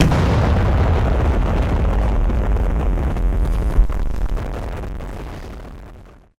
Dirty explosion
Sounds like near explosion
With a crackling noise
FX, explosion, Dirty